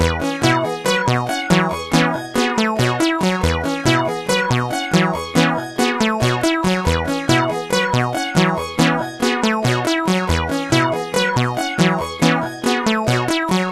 A weird sequence from my Nord Modular through a pitch-shifting echo. 4 bar 70 BPM loop
70-bpm, digital, echo, funny, loop, nord, pitch-shift, sequenced